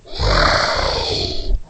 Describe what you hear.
dragon roar mild 17
Dragon sound created for a production of Shrek. Recorded and distorted the voice of the actress playing the dragon using Audacity.
beast, creature, monster, vocalization